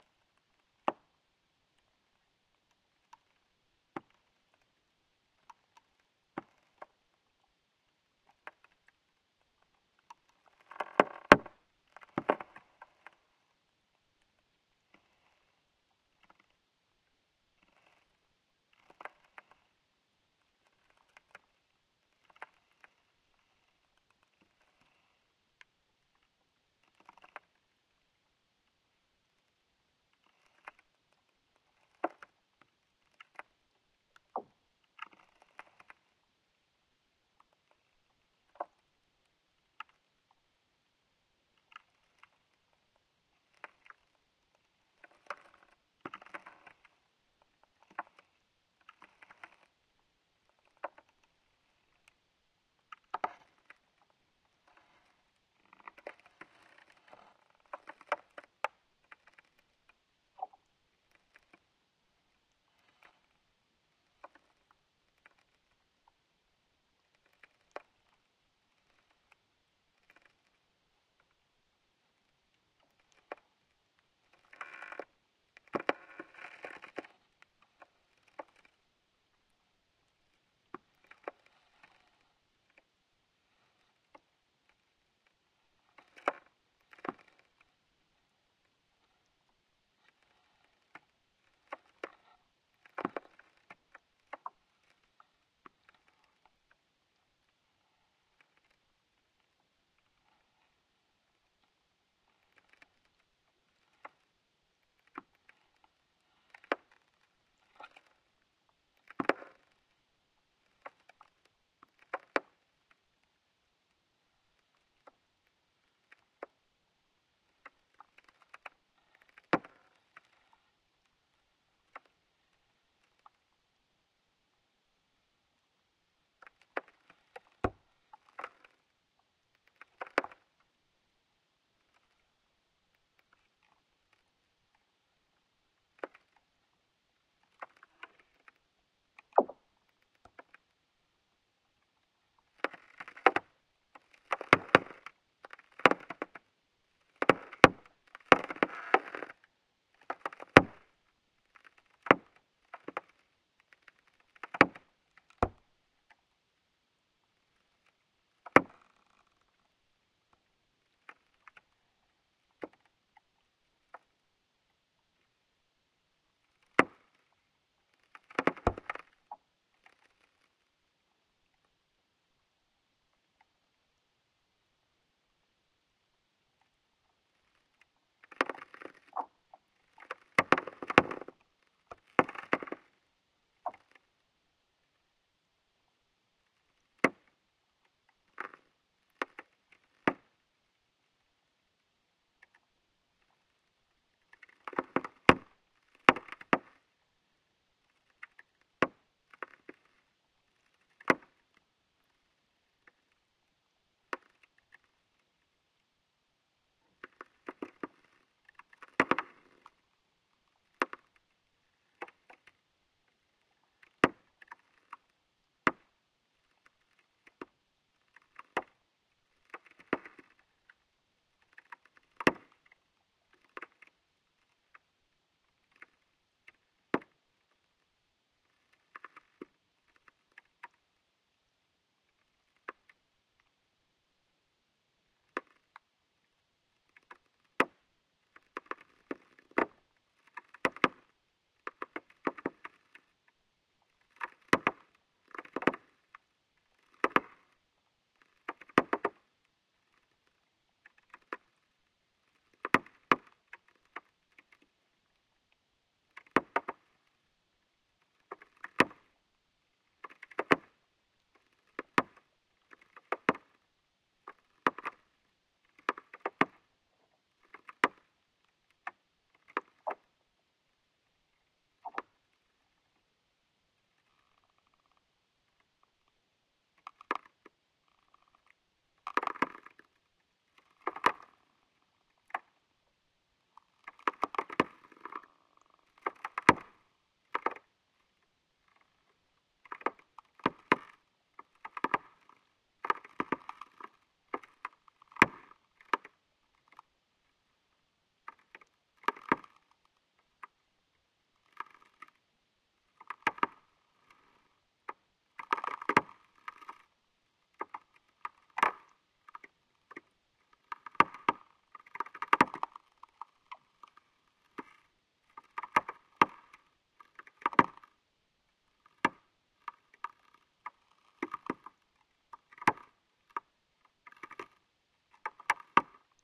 Field recording from an island just outside Helsinki, Finland. Ice is almost melted, just thin layers left.. It was a sunny day so ice kept craking, some light waves. Almost no wind.
Hydrophone -> Tascam HD-P2, light denoising with Izotope RX7
craking, field-recording